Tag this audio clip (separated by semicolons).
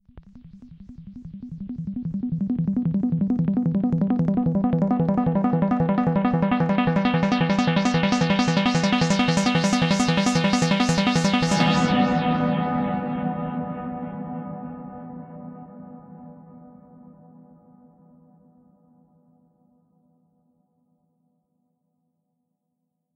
synthesizer; arp; wahwah